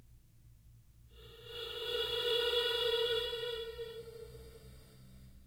for dark ambience